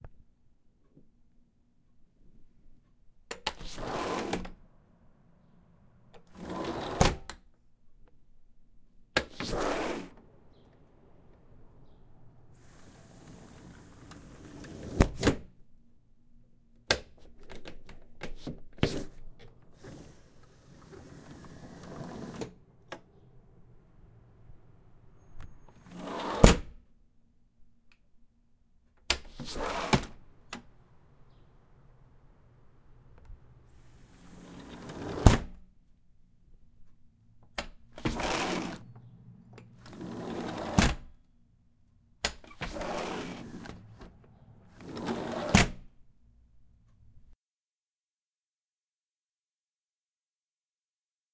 RG Window
Five various speeds and intensities of a sliding window opening and closing.
slide, close, open, window